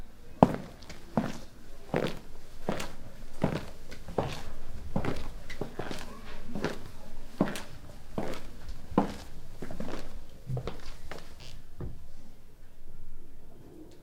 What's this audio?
man walking away indoors with leather leathery shoes footsteps foley
away, foley, footsteps, indoors, leather, leathery, man, shoes, walking